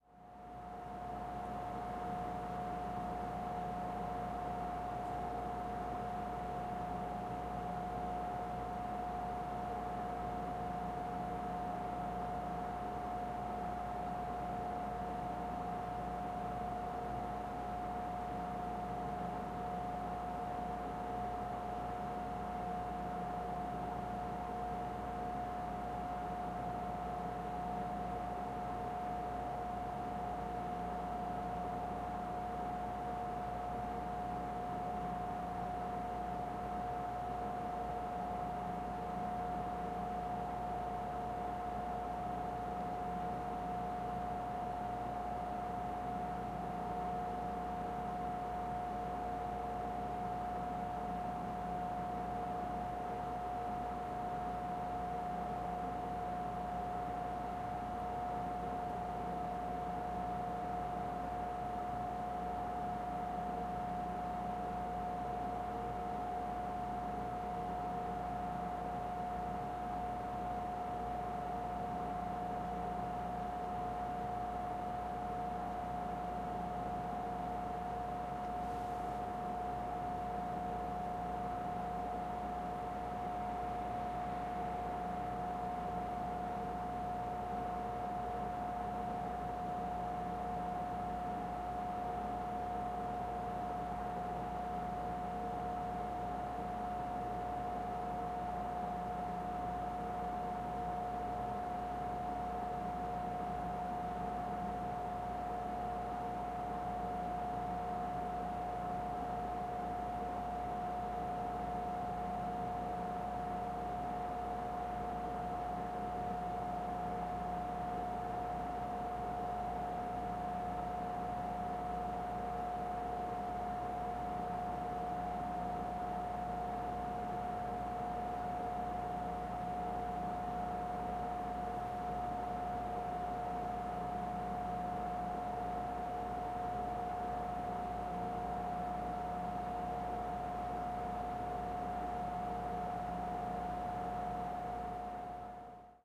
Room tone of an empty train station in Banff, Alberta. Recorded in stereo, XY.